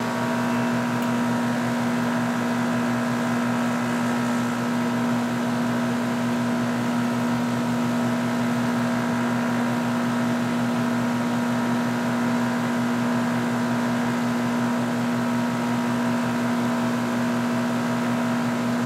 generator small 01
Recorded a generator for my air conditioner with a Zoom H6 with the lows cut.